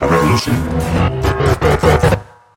Another transformer sound